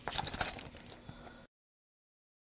Snippet of handcuff sound for song element.